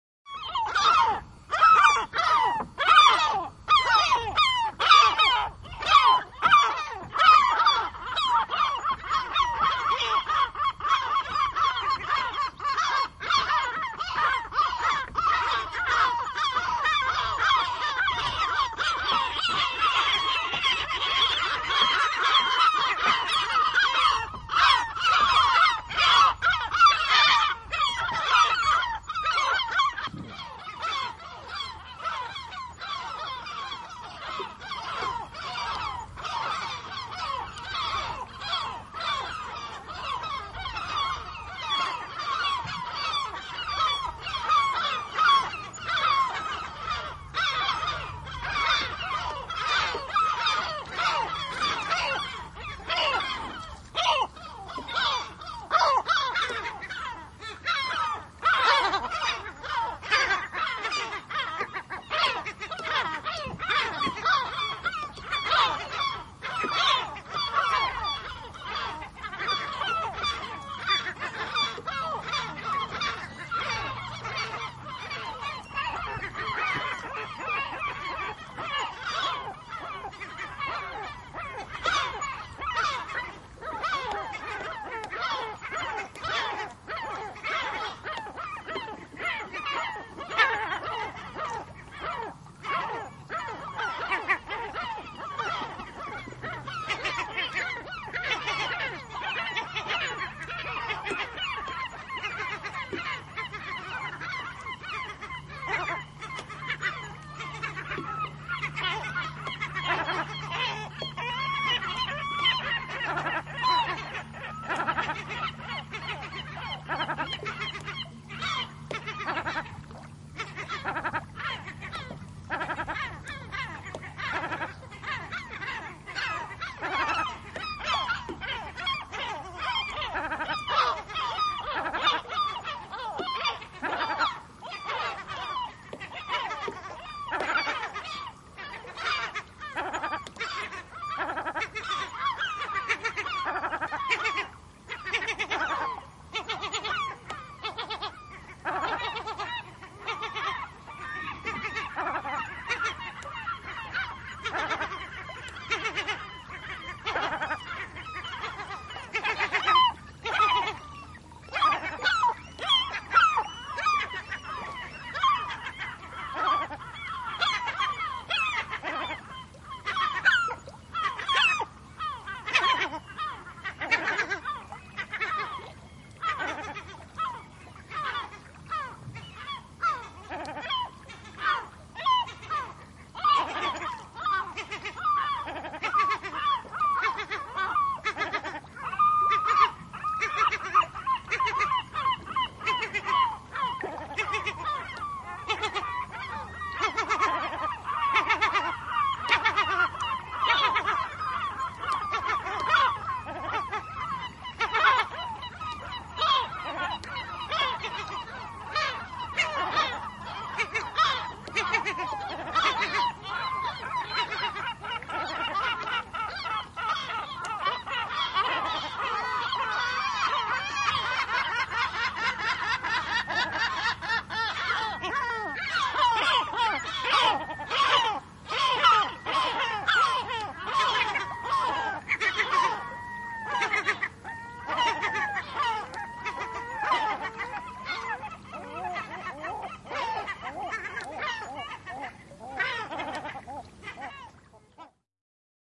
Selkälokki, äänekäs parvi / Lesser black-backed gull, a loud, noisy flock on a rocky island
Selkälokit huutavat, kiljuvat ja kaklattavat kalliosaarella.
Paikka/Place: Suomi / Finland / Puruvesi
Aika/Date: 13.06.1995